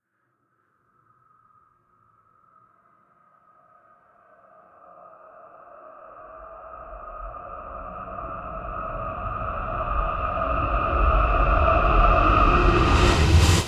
Dramatic Build up
This interlude is perfect for capturing those cinematic moments with one sound.
build-up, dramatic, interlude, intro, outro